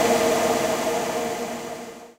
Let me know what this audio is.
These set of samples has been recorded in the Batu Caves temples north of Kuala Lumpur during the Thaipusam festival. They were then paulstretched and a percussive envelope was put on them.
BatuCaves, Paulstretch, Thaipusam